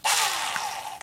Very short burst from a screwdriver.
drill, electric, machine, mechanical, motor, screwdriver, tool, whir